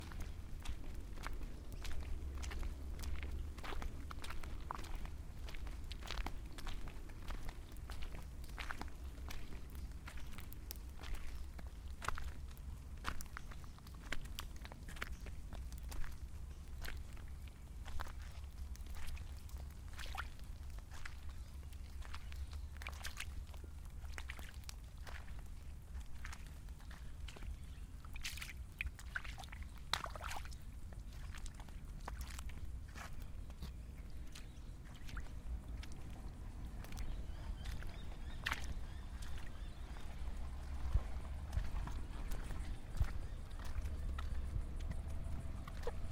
MUDDY AND WET FOOTSTEPS
Several footsteps through muddy grass, wet gravel and puddles.
EQ'd in Logic Pro X.
created by needle media/A. Fitzwater 2017